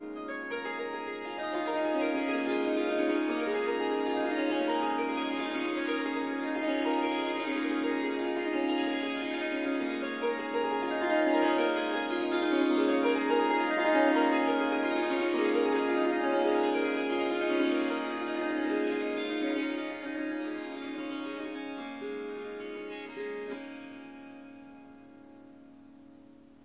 Harp Melodic Wild Run Down
Melodic Snippets from recordings of me playing the Swar SanGam. This wonderful instrument is a combination of the Swarmandal and the Tampura. 15 harp strings and 4 Drone/Bass strings.
In these recordings I am only using the Swarmandal (Harp) part.
It is tuned to C sharp, but I have dropped the fourth note (F sharp) out of the scale.
There are four packs with lots of recordings in them, strums, plucks, short improvisations.
"Short melodic statements" are 1-2 bars. "Riffs" are 2-4 bars. "Melodies" are about 30 seconds and "Runs and Flutters" speaks for itself. There is recording of tuning up the Swarmandal in the melodies pack.
Melody, Swar-sangam, Melodic